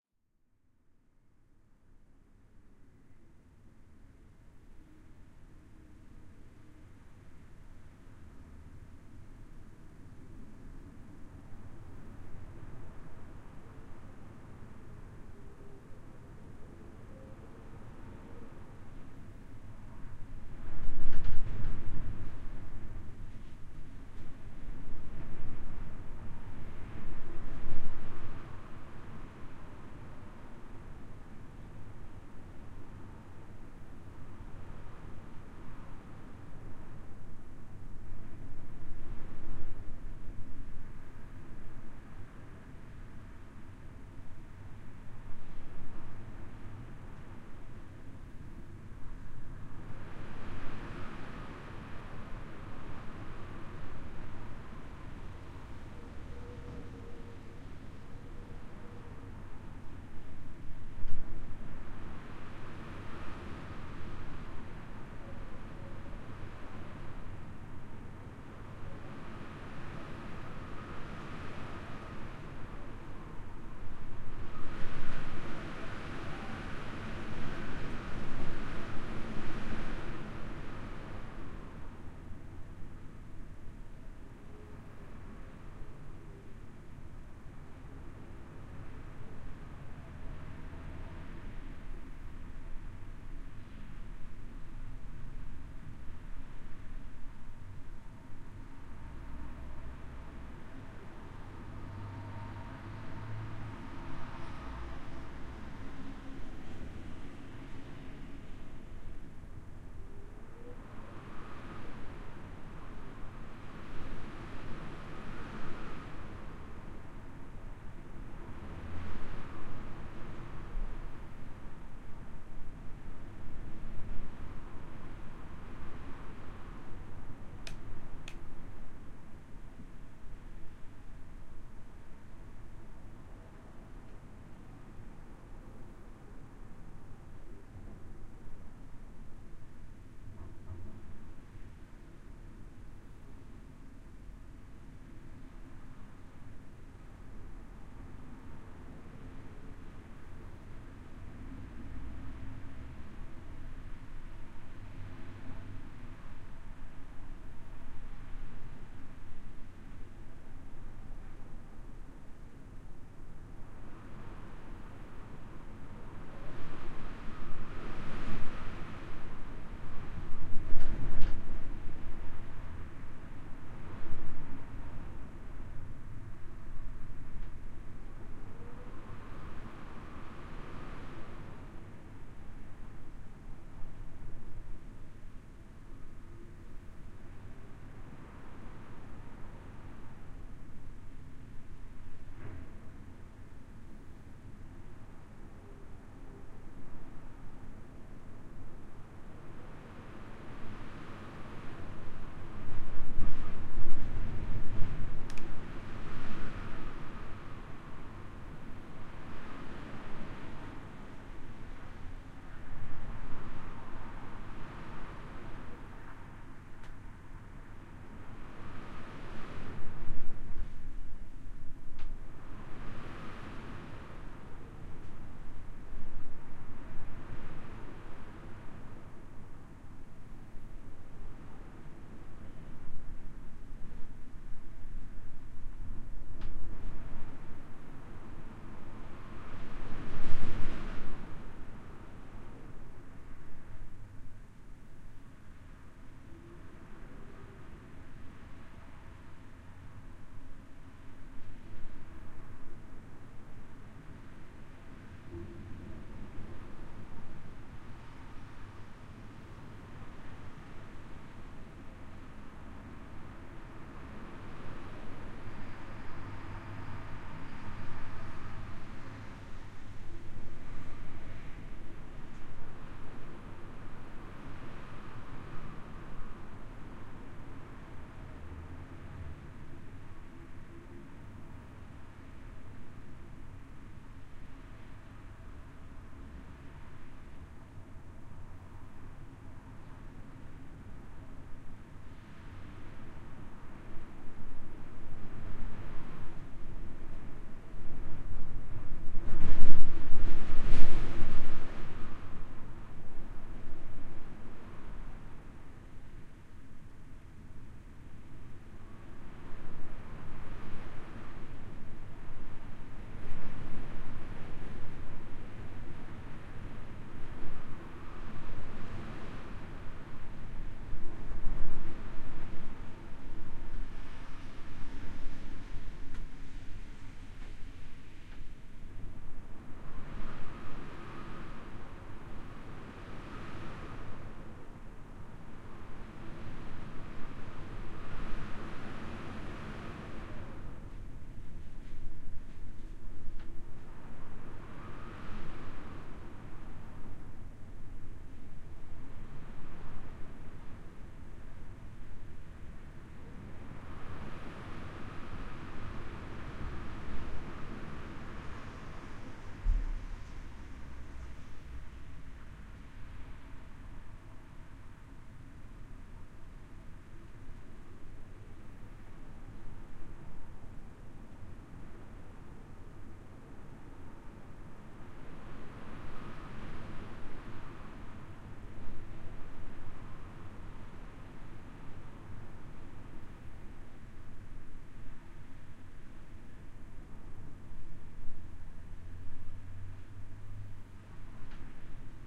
Wind excerpt
Stereo fieldrecording. A stormy(ish)day in Trondheim, windows shaking and wind howling. Some distant traffic. Two omni lavs 20cm apart, with baffle (rolled up blanket)>DIYBatterybox>R-44
quiet, howling, wind, bedroom